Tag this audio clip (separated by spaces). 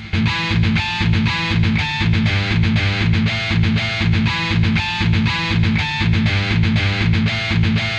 electric fast guitar heavy loop metal power riff rock